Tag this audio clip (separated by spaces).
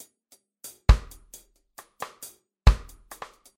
drum reggae loops